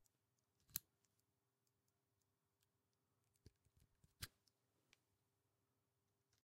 cable input 1
inputing xlr cable into large diaphragm microphone
microphone, input, cable